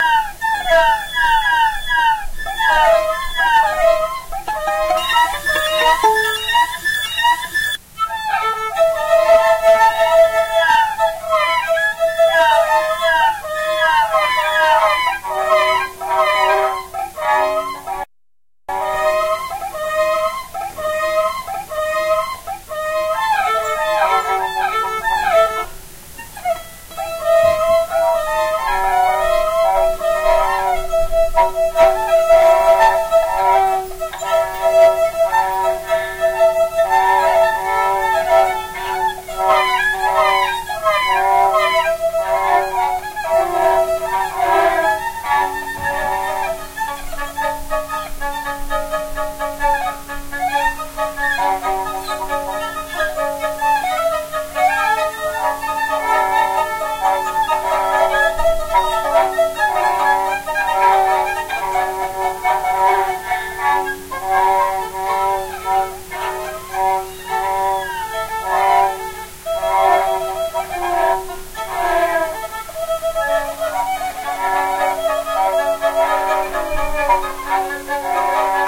Random playing of noisy violin phrases with the great kazoo.
violin, random, phrase, noise